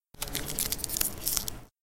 This plastic sound belongs to a sellotape being stretched. It was recorded on a desk from the upf poblenou library with an Edirol R-09 HR portable recorder placed very close to the source.
adhesive, campus-upf, library, upf